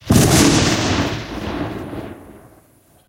Open Gun Shot
This is a real recording of a shooting that happened right outside my house, I managed to get a clean recording of one of the shots that happened, I hope for the very best who got involved as I currently know nothing about what happened...